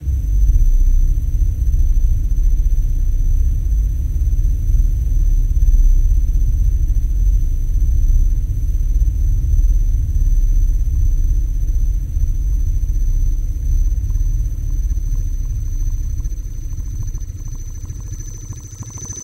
Deep Bass Drone
A low, rumbling bass sound with a short little "gurgle" at the end. A creepy sound for scary conditions. Made by low-passing a unisoned saw + subtle FX.